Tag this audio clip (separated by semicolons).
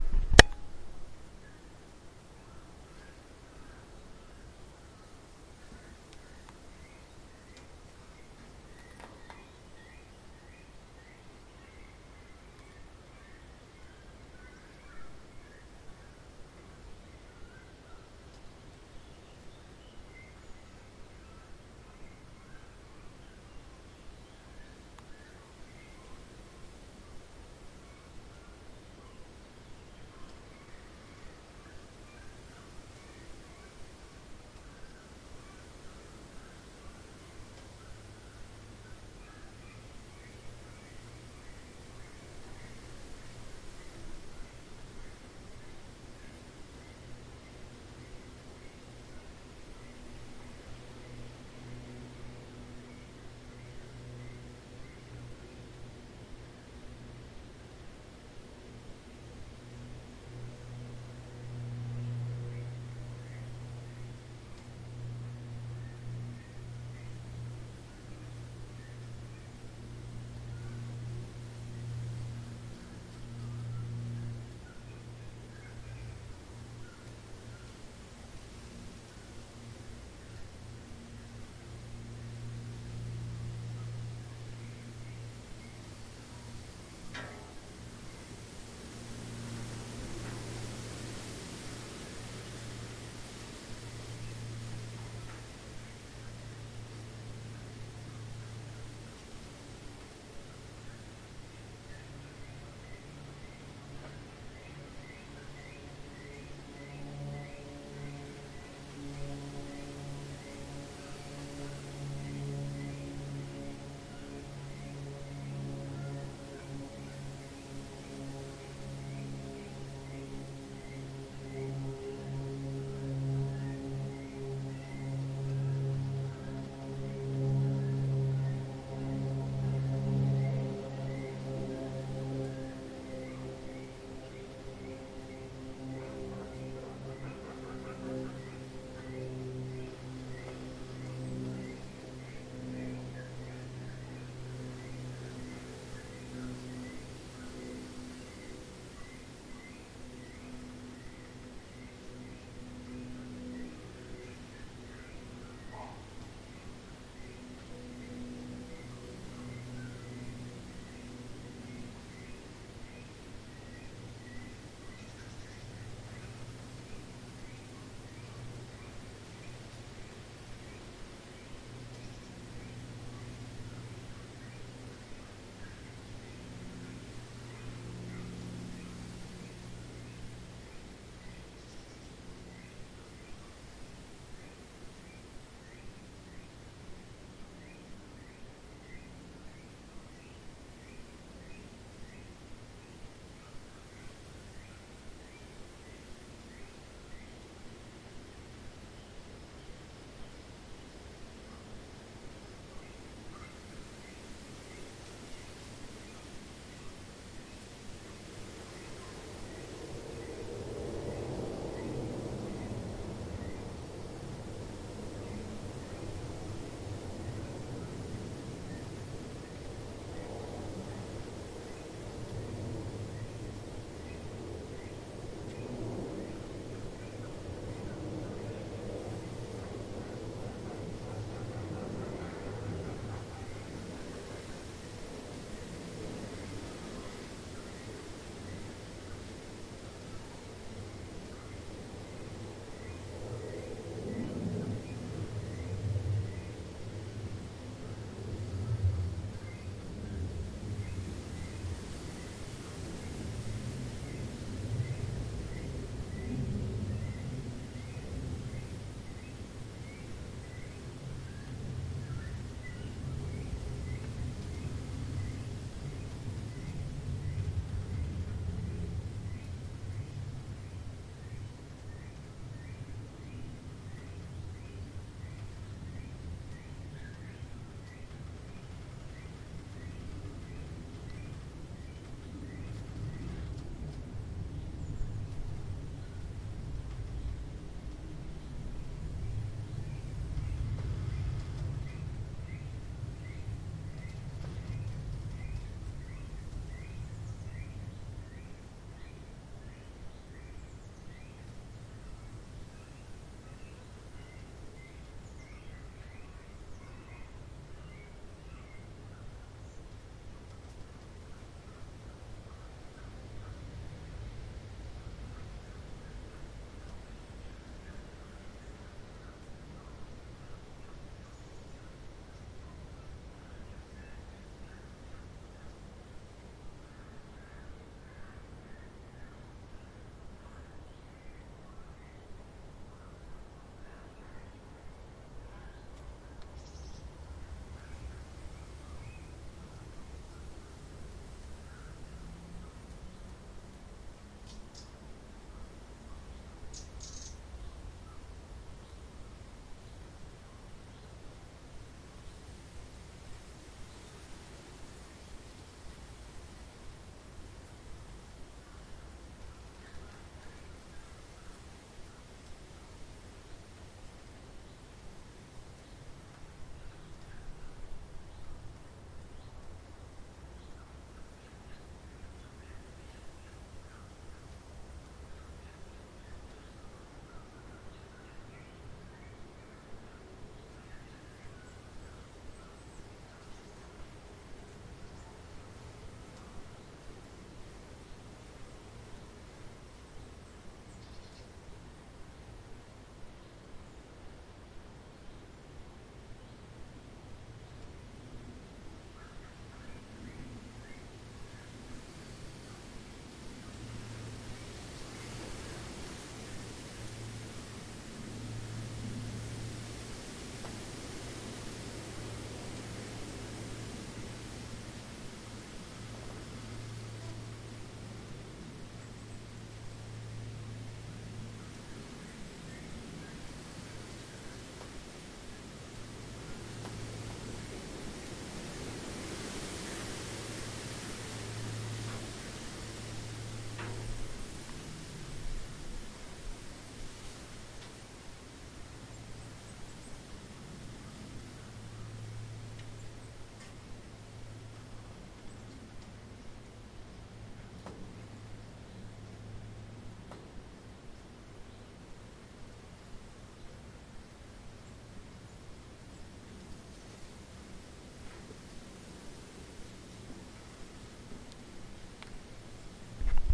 birds; Bog; Countryside; Field; lake